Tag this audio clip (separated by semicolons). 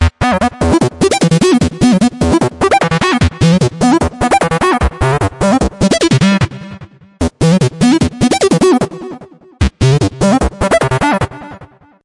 150; 150BPM; bpm; DaSilva; Hardstyle; Melody